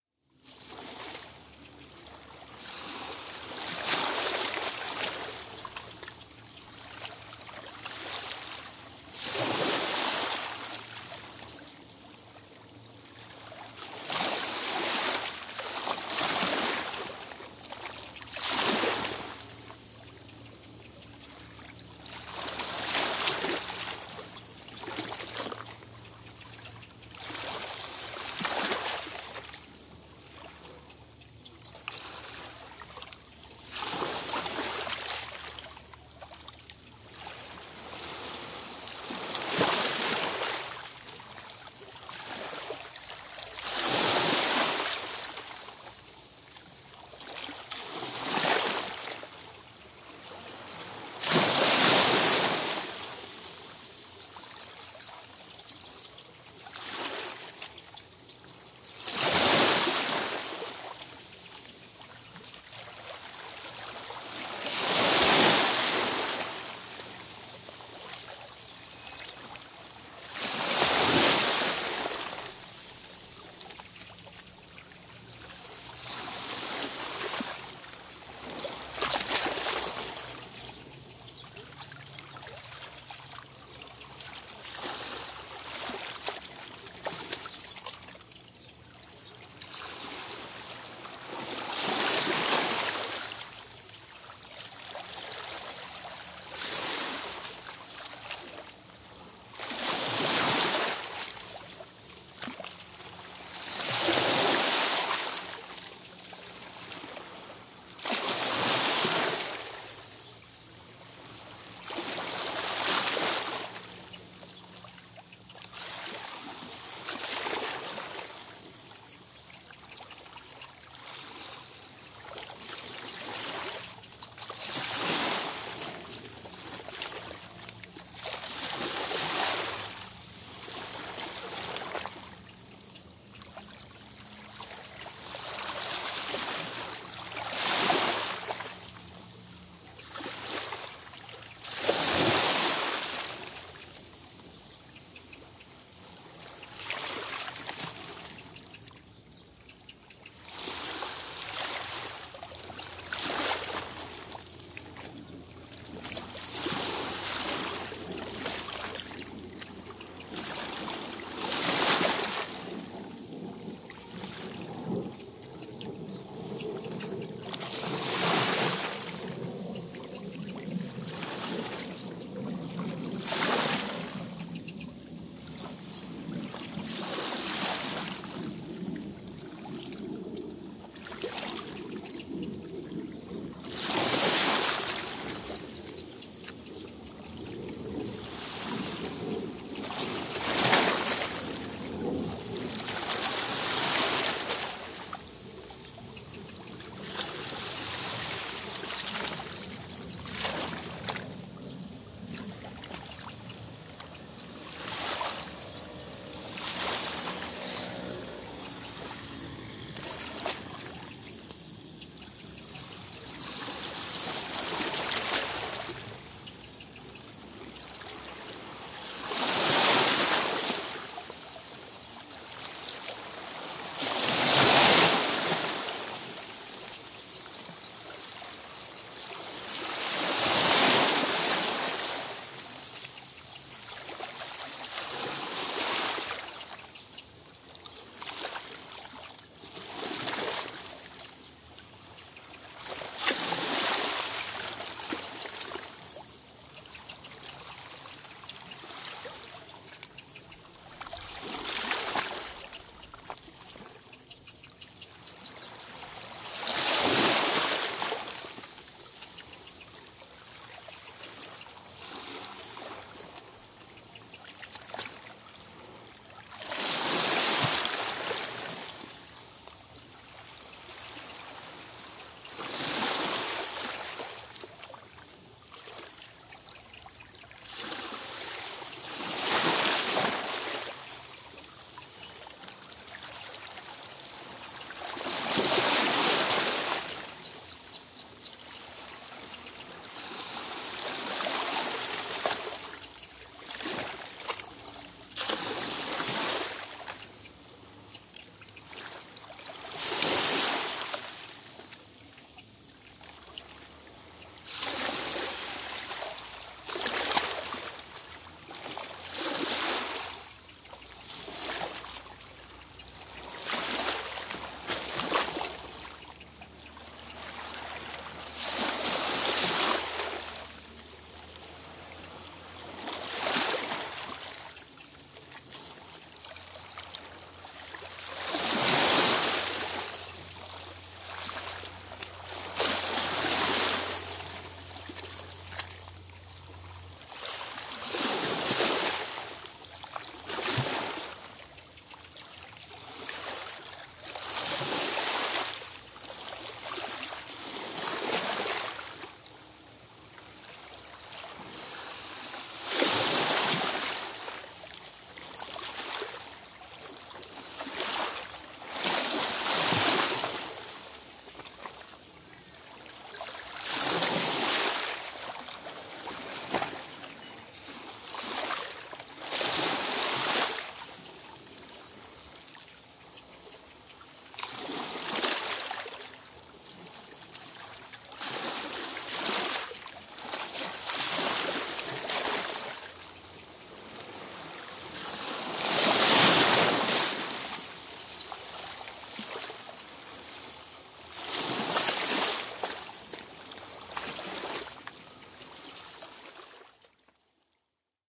antalya seashore
seashore atmo near antalya, turkey
antalya, mediterranean, seashore, turkey